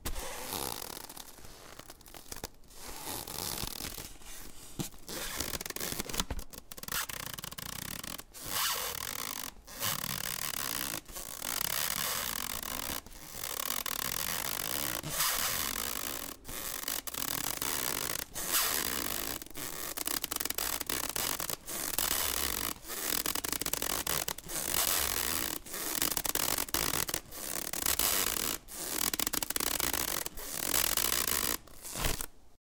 Rubbing and touching and manipulating some styrofoam in various ways. Recorded with an AT4021 mic into a modified Marantz PMD 661.